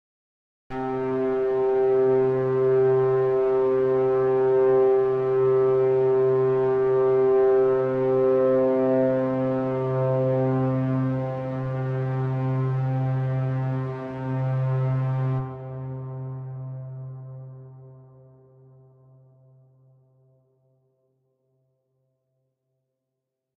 A synthesised cello sound - played and held at midi note 60 C - made in response to a request from user DarkSunlight